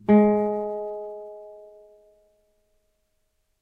1 octave g#, on a nylon strung guitar. belongs to samplepack "Notes on nylon guitar".
music, strings, nylon, note, string, guitar, notes, tone